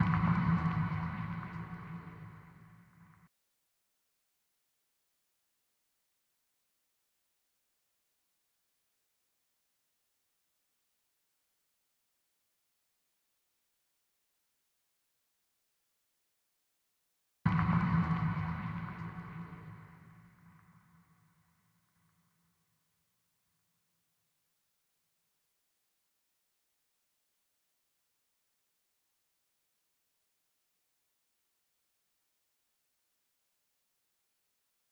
Lofi Hit
Trap hit, 110 BPM